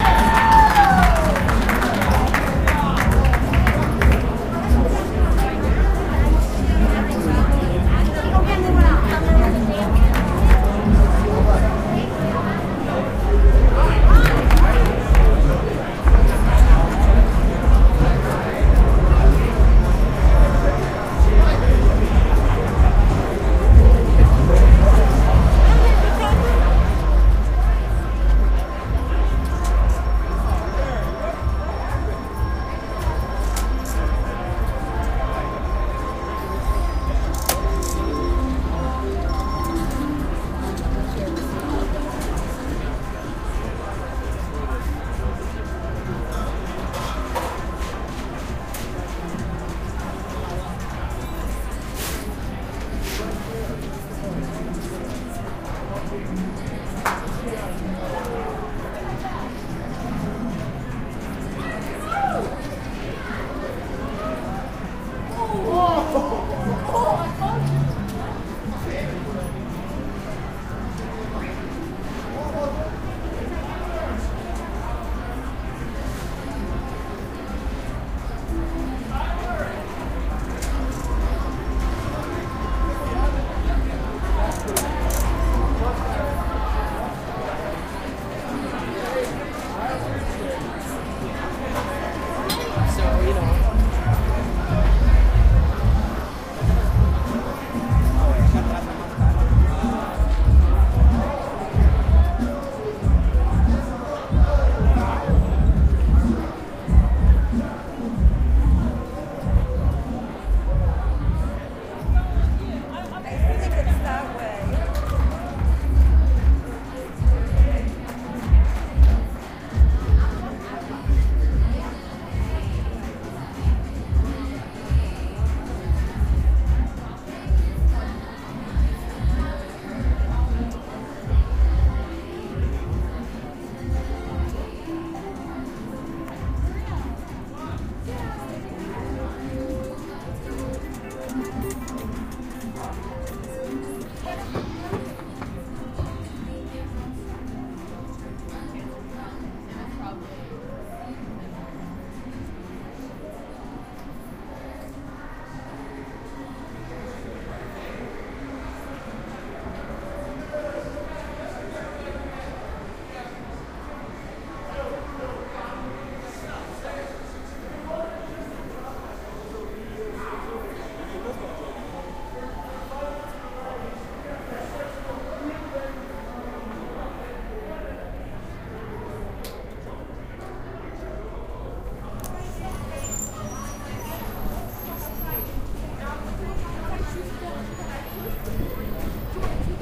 MGM Grand Las Vegas
A walk through a crowded MGM Grand Casino in Las Vegas at 2 am on a friday night. This recording begins by the crap tables where a group of lucky gamblers just hit it big. The walking tour continues past rows and rows of slot machines, card tables and finally back outside to valet parking. (Recorded 4/12/08)
casino, las-vegas, field-recording, mgm-grand